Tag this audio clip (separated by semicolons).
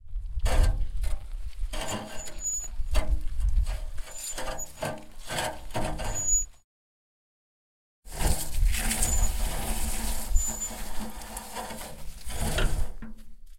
CZ; Czech; Pansk; Panska; wheelbarrow